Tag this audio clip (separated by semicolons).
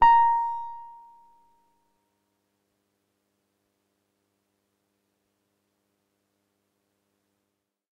electric; wurlitzer; e-piano; 200a